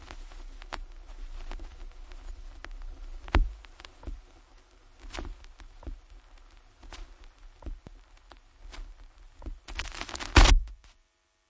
Vinyl Runout Groove 01
Run out groove of a 12" LP @ 33⅓ RPM.
Recording Chain:
Pro-Ject Primary turntable with an Ortofon OM 5E cartridge
→ Onkyo stereo amplifier
→ Behringer UCA202 audio interface
→ Laptop using Audacity
Notched out some motor noise and selectively eliminated or lessened some other noises for aesthetic reasons.
33RPM, analogue, crackle, hiss, LP, noise, record, record-player, retro, run-out-groove, stylus, surface-noise, turntable, vintage, vinyl